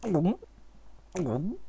Two gulping sounds!
gulp, voice